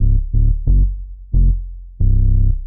90 Subatomik Bassline 03
fresh rumblin basslines-good for lofi hiphop
atomic, bassline, electro, free, grungy, hiphop, loop, series, sound